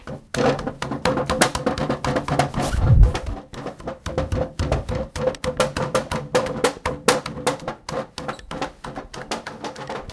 We can listen sounds recorded at school.